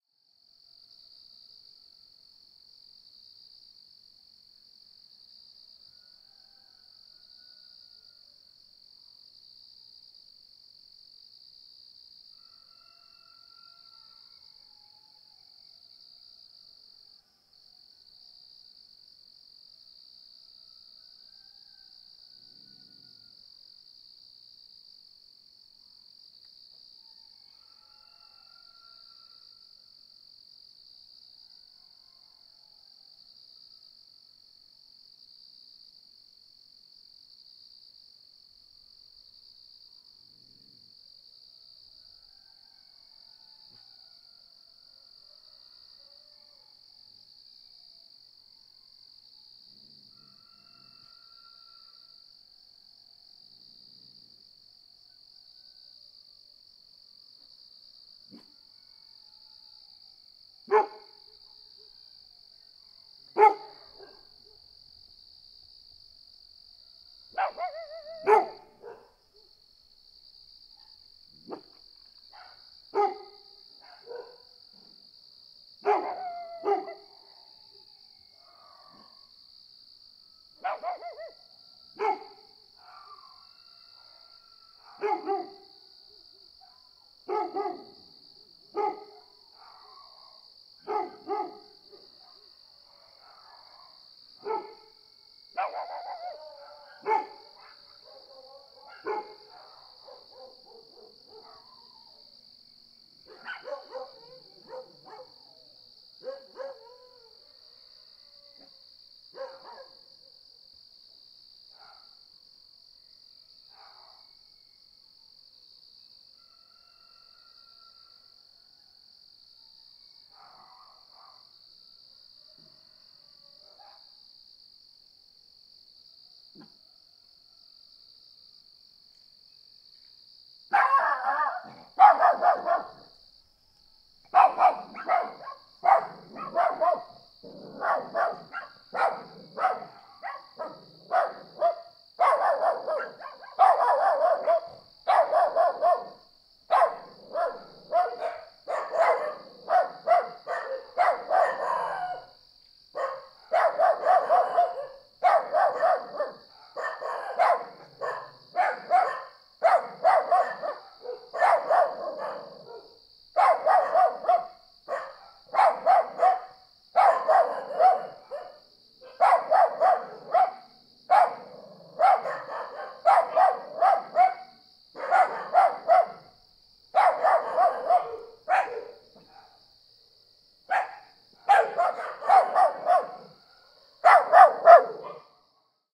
Atmosphere with crickets and dogs at night (rural land)
Atmosphere with crickets and dogs at night. Rural land, without any machine sounds. Mono sound, registered with microphone Sennheiser ME66 on boompole and recorder Tascam HD-P2. Brazil, october, 2013.
ambiance, ambience, ambient, atmos, atmosphere, background, brazil, cinema, cricket, dog, field, film, land, mono, night, recording, rural